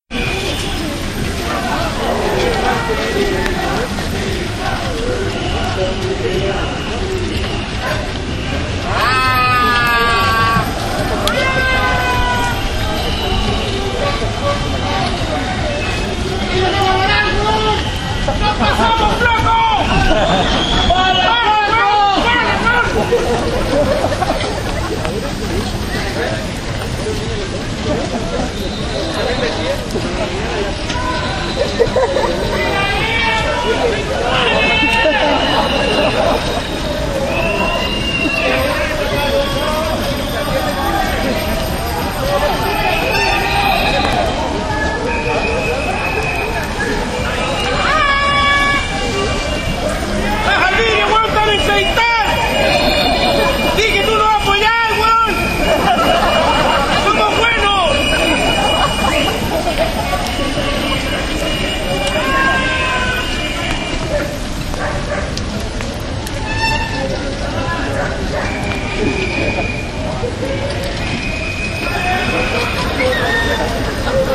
Cyclists Protest in June 2014, Chile
bicycle, demonstration, political, protest, protest-march, shouting